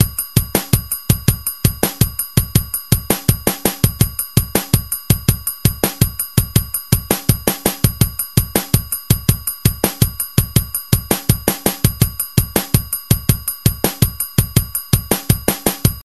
11-8 beat d extended
A drum pattern in 11/8 time. Decided to make an entire pack up.
08, 11-08, 11-8, 8, drum, kit, pattern